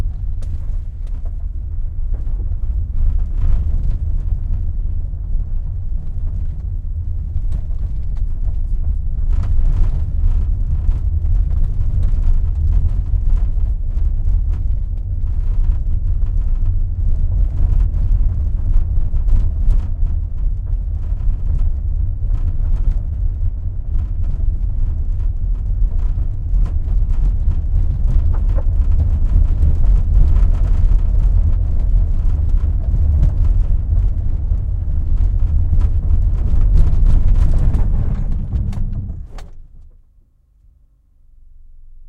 Interior sound of driving on bumpy road with braking
breaking, bumpy, car, country, driving, field, interior, road